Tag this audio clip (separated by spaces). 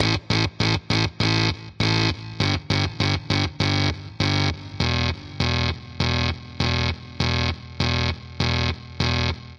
drums filter free guitar loops sounds